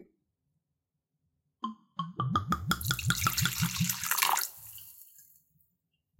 Pouring whiskey from full bottle to whiskey glass